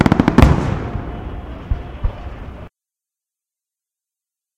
hilow multi hits
recording of a multi firework explosions
ambience, distant, double, explosion, fire, fireworks, high, hit, loud, low, multi, outside